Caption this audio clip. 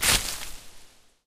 Hitting a small bush with a flashlight.
foliage, leaves, slap, rustle, bush, stereo, impact, hit